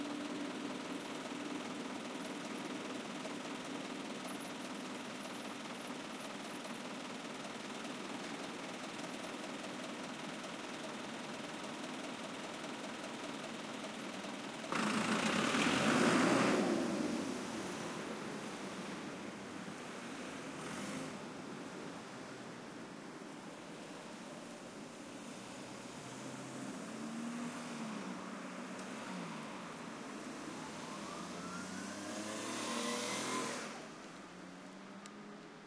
traffic japandiesel
recording of a diesel truck waiting at a stop light in traffic. taken around noon in tokyo, japan.
diesel, japan, traffic, truck